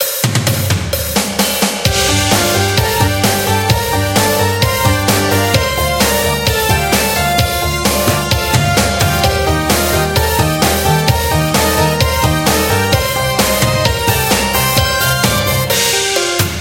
Loop Max Power 00
A music loop to be used in fast paced games with tons of action for creating an adrenaline rush and somewhat adaptive musical experience.
games, music-loop, videogame, videogames, indiedev, victory, music, gaming, war, gamedeveloping, game, gamedev, Video-Game, battle, loop, indiegamedev